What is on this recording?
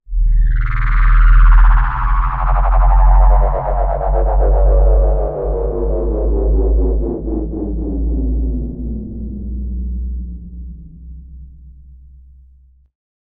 A sci-fi UFO effect created on SimSynth.